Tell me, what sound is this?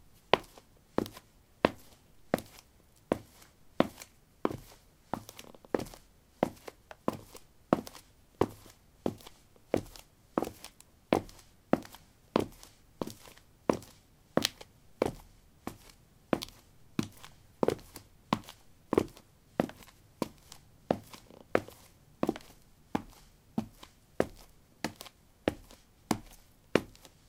paving 08a womanshoes walk
Walking on pavement tiles: woman's shoes. Recorded with a ZOOM H2 in a basement of a house: a wooden container filled with earth onto which three larger paving slabs were placed. Normalized with Audacity.
footstep, footsteps, step, steps, walk, walking